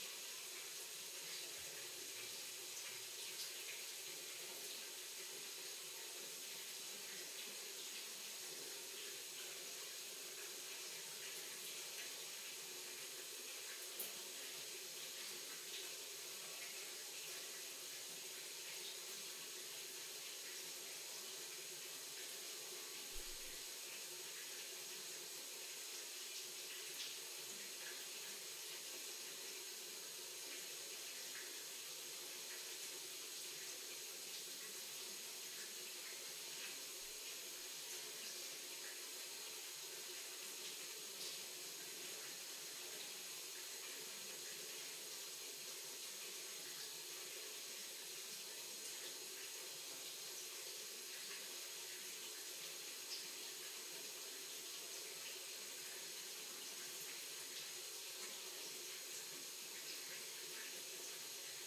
light waterpipes ambience1
Sound of a water-pipe stream. Some natural room reverberation is present
Recorded with Oktava-102 microphone and Behringer UB1202 mixer.
ambience, hiss, kitchen, noise, pipe, stream, water